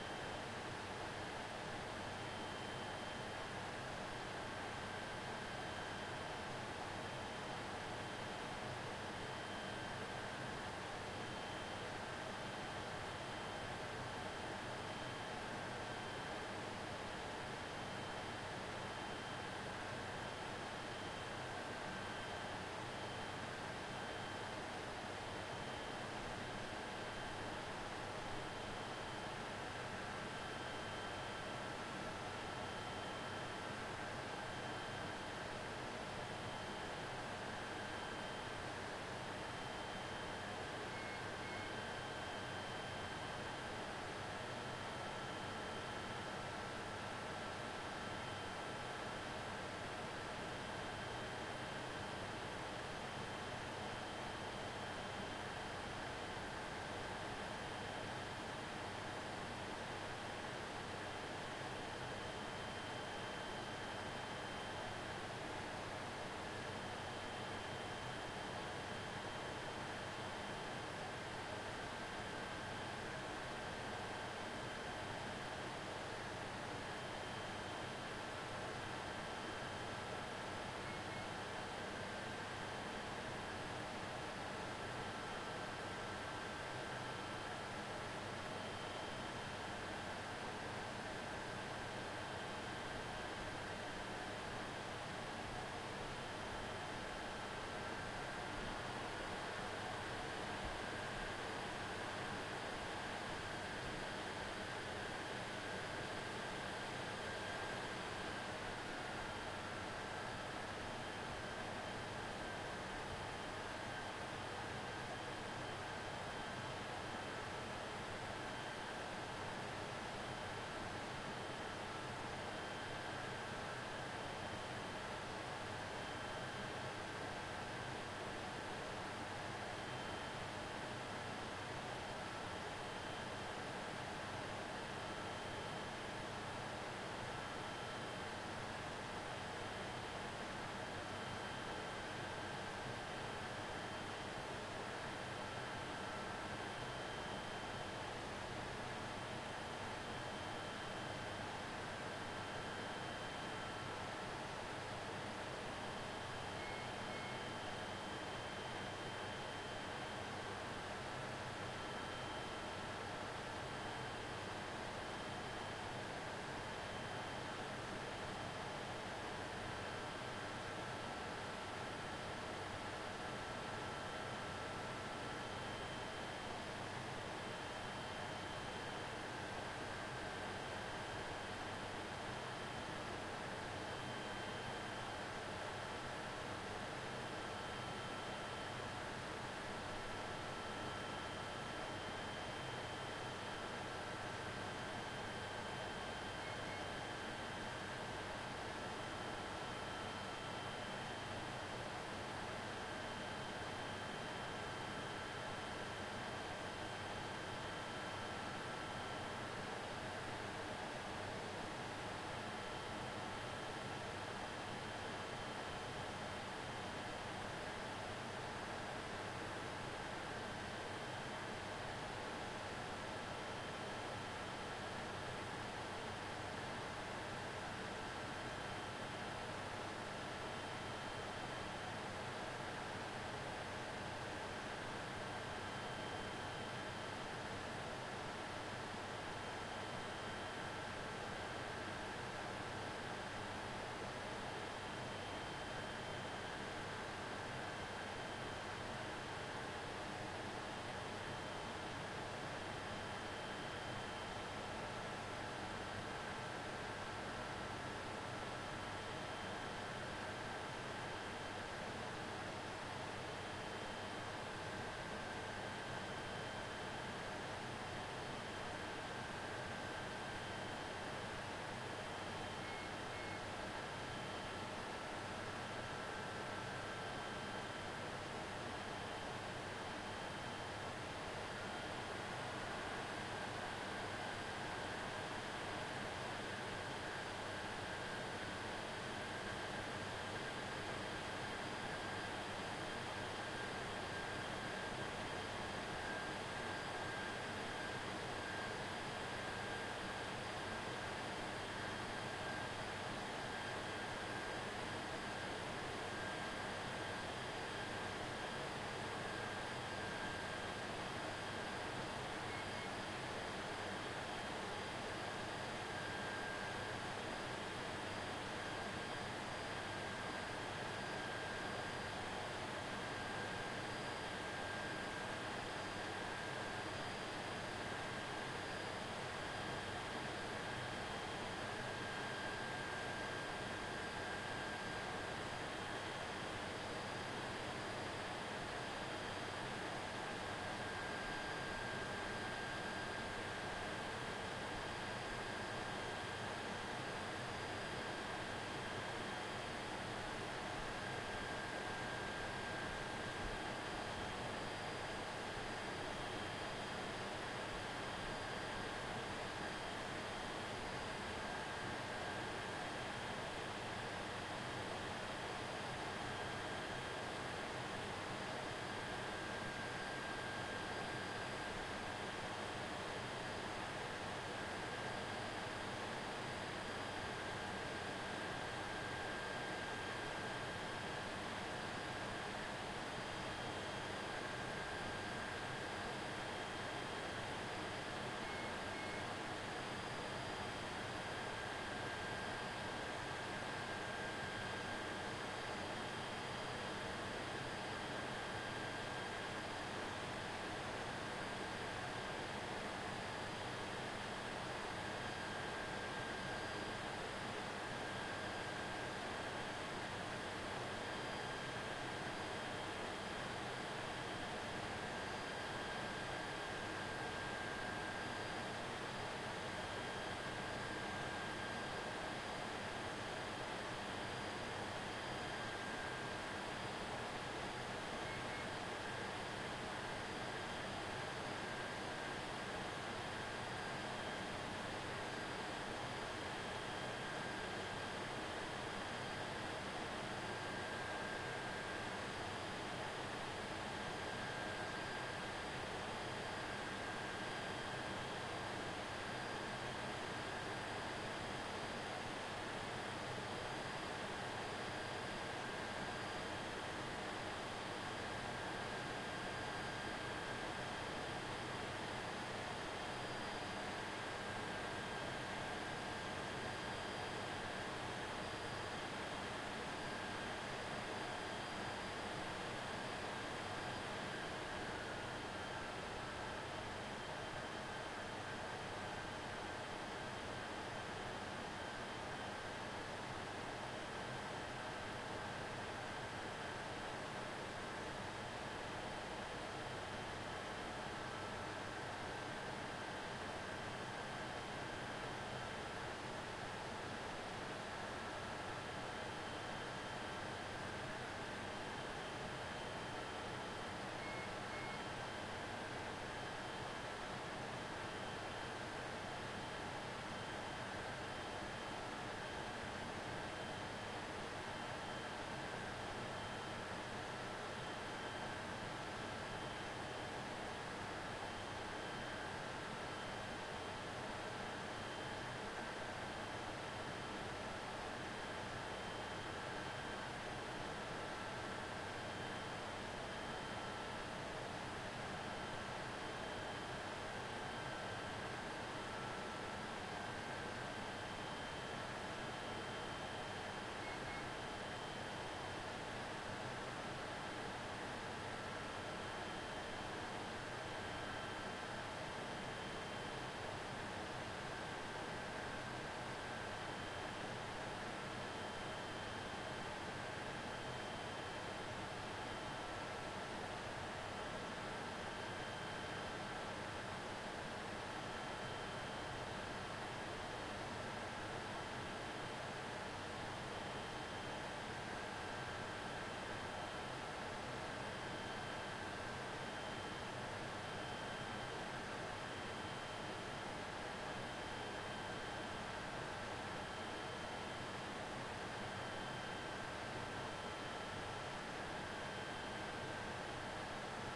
Engineering Lab. No people. Large air-conditioned room full of servers, fan sounds, fans changing speed, hum and whirring noises.
Part of a pack recorded in different labs/server rooms.
Zoom H1, internal mics capsules, no filters.